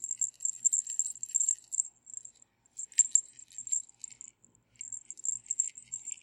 tiny bell2
shaking some bells on my cell phone charm
bell, jingle, small, tiny